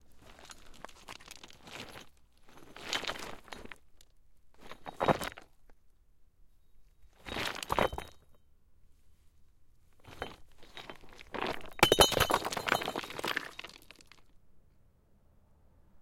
SFX Stone Calcit DeadSea Movement #1-165
glassy stones slightly moving